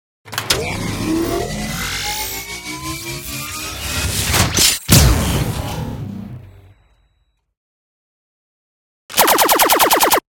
SciFi Gun - Mega Charge Cannon
big boy.
Ingredients:
- custom Massive synth patches; resampled
- Twisted Tools S-Layer for those grain/squelchy sounds
- misc foley for the mechanical bits
- lots of hand automation
(Sorry about the pew pew laser at the end, that was an error.
Huge, Gun, Sci-Fi, Mechanical, Energy, Blast, Spinning, Charge, Laser, Weapon, Epic, Cannon, Plasma